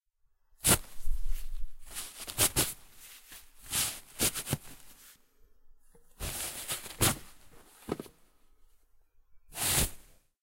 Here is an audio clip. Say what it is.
Tissue Pull
Tissues being pulled out of a tissue box.
box, dispense, dispenser, kleenex, pull, pulling, rustle, rustling, tissue, tissue-box, zoom-h4n